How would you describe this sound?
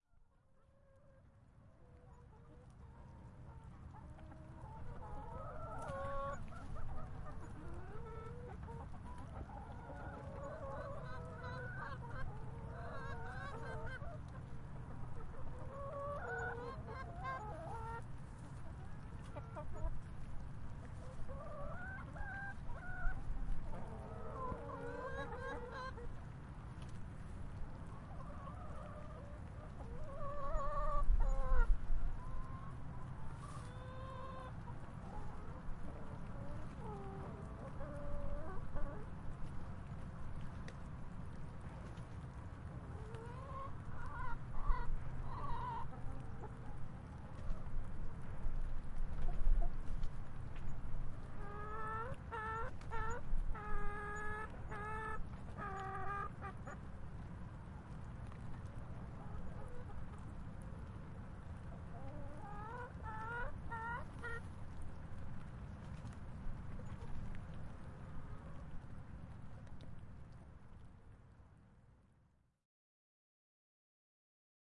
Chickens making soft sounds in a light rain.
chickens, light-rain, field-recording